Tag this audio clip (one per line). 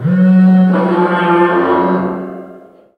dark
enormous
hand
echo
audio
cool
dreamlike
huge
hands
big
dream
alert
converters
cup
cell
bizarre
gigantic
design
frontier
group
edit
contact
cd
disc
industrial
impulse
impact
compact
evil
ball